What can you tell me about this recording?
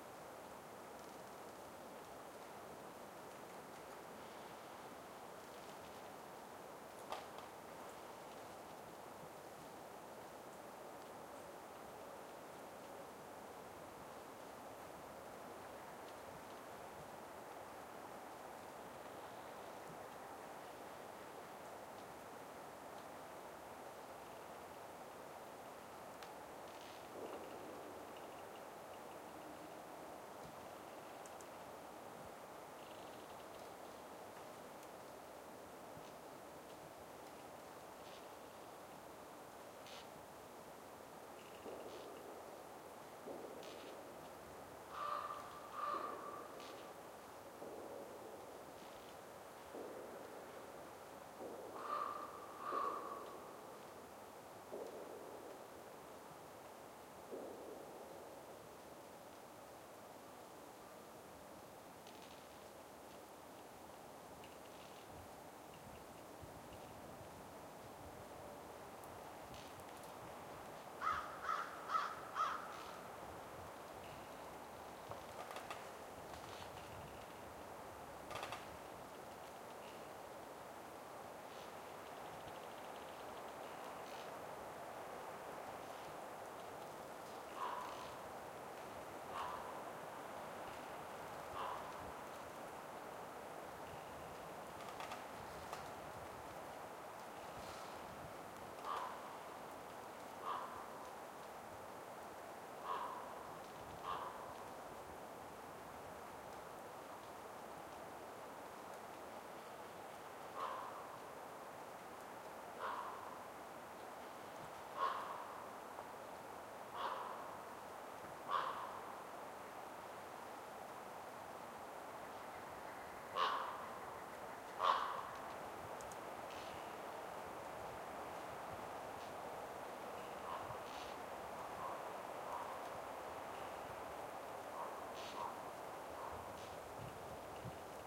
forest ambience copake winter 1
Forest ambiance, Copake, Columbia County, New York, USA. Tree branches creaking, crows and ravens cawing, distant sounds of wind in trees, far-away traffic, and gunshots.
Birds: American Crow (#1:11), Common Raven (elsewhere). See my bird-identification notes.